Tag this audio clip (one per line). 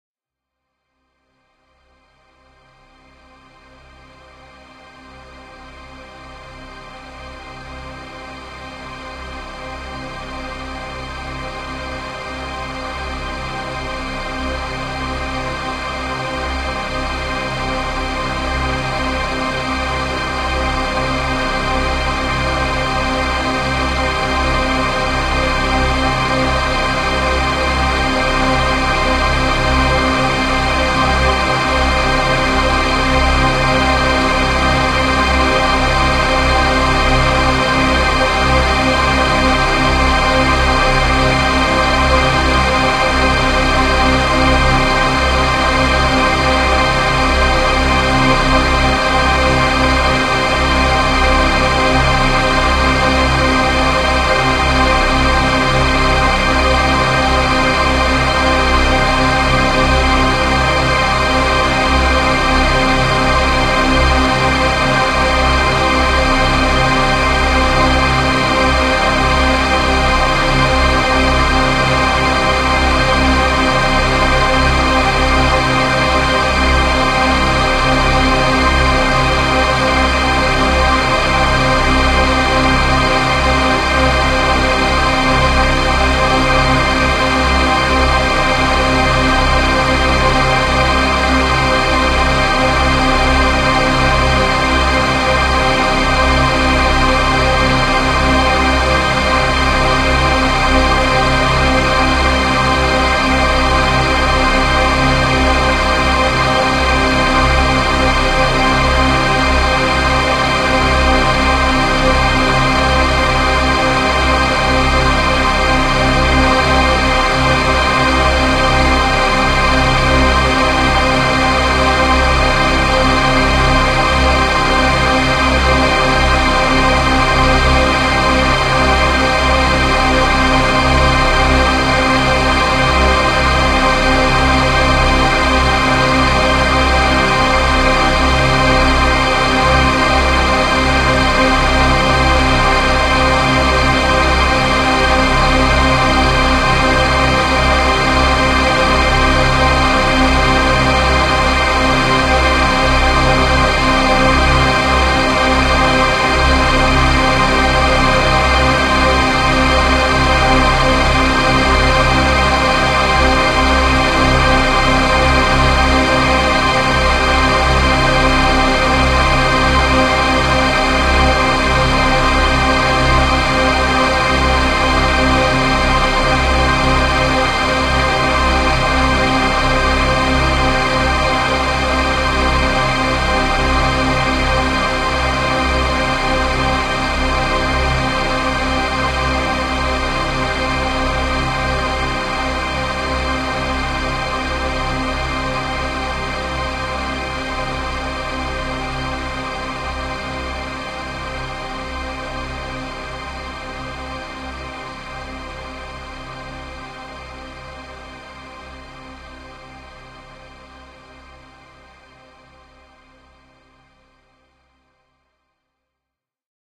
Impulse
Motorway
Sound
Cathedral
Reverb
Passing
Dance
Response
Room
Film
DJ
Movie
Horror
Cinematic
Electronic
Locomotive
City
IR
Atmosphere
Music
Ambience